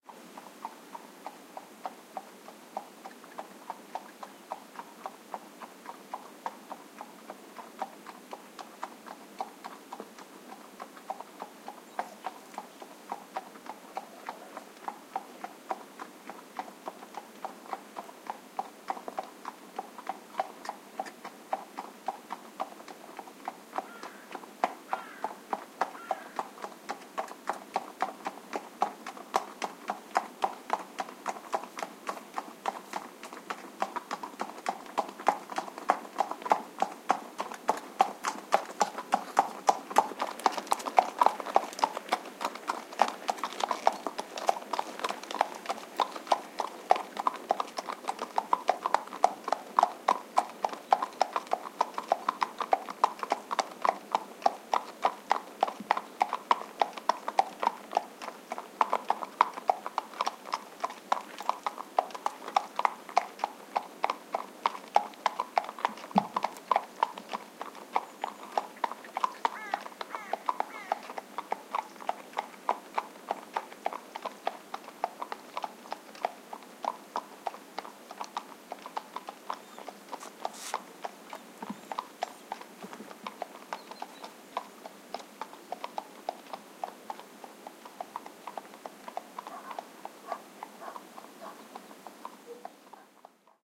trotting horse in rural road
Recordings on a country road in the North of Spain , where by surprise I came across a horse came trotting producing a panoramic effect from left to right curious.
field-recoding, horse-sound, rural-sound, soundscape